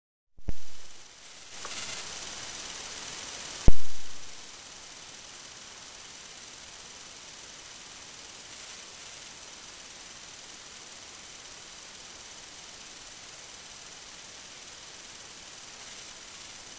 electric noise edirol r-44 pumped volume
edirol-r-44, electric, noise